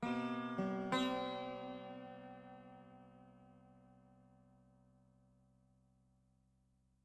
sarod intro
Sarod w/no processing. intro riff.
indian, sarod